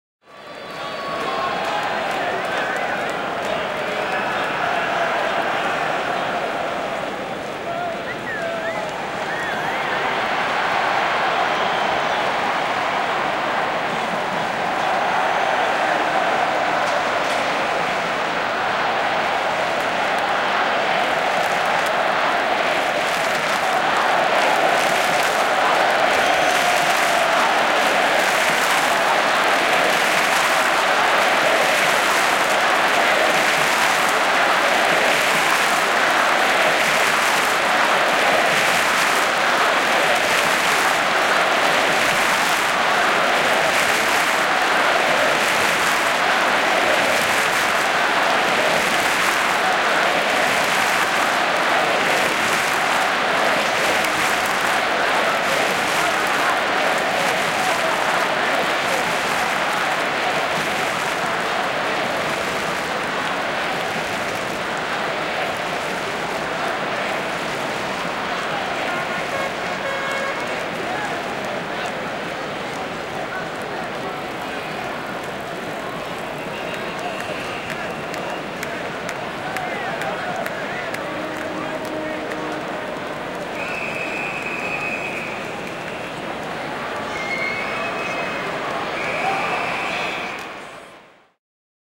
Urheilukilpailut, yleisurheilu, kannustava yleisö / Large, supportive crowd, sports competition, athletics, audience cheering and clapping rhytmically in the stadium, some horns
Yleisön rytmikästä kannustusta ja taputusta stadionilla, torvia. (EM 1994, Helsinki).
Paikka/Place: Suomi / Finland / Helsinki, Olympiastadion
Aika/Date: 07.08.1994
Athletics, Audience, Cheer, Clap, Crowd, Field-Recording, Finland, Finnish-Broadcasting-Company, Huuto, Ihmiset, Kannustus, Katsomo, Kilpailut, Kisat, Noise, People, Soundfx, Sports, Spur, Suomi, Taputus, Tehosteet, Urge, Urheilu, Urheilukisat, Yle, Yleisradio